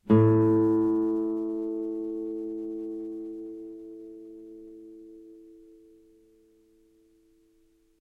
A open string
open A string on a nylon strung guitar.
open, nylon, spanish, a